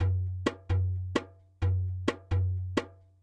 BAS DARBUKA
130 bpm 7/8
130-bpm, anatolian, perc, percs